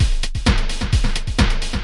130bpm drum loop
130 bpm drum loop made in FL studio 11
130-bpm, 130bpm, drum-loop, electronic, loop